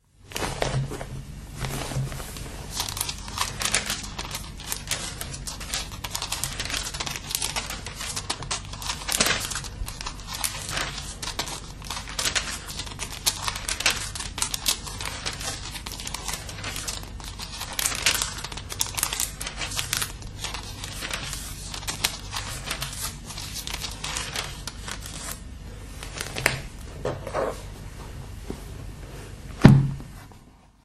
Turning the pages of the book 1st Chronicles in the bible (dutch translation) the church has given my father in 1942. A few years later my father lost his religion. I haven't found it yet.
paper, book, turning-pages